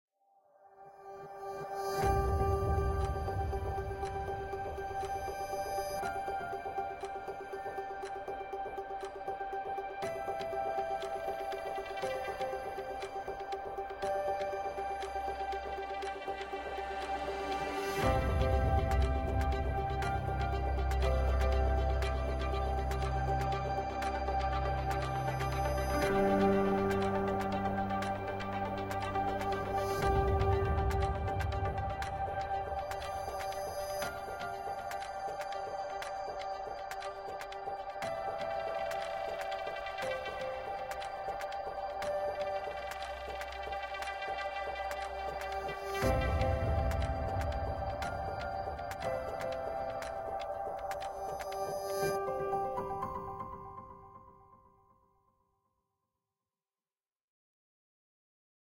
Corporate company introduction video
Very simple track that could be used in a product video or something to advertise a service/product.